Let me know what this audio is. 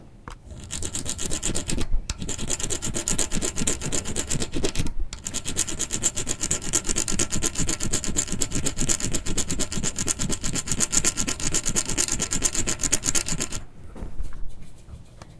Recording of a quarter being scratched against a rough metal ledge along a desk.
writing, scraping, filing, carving, rubbing, sliding, scratching, scratch, card, saw, file, scaling-fish, coin, metal, sharpening, scrap, cutting, scribbling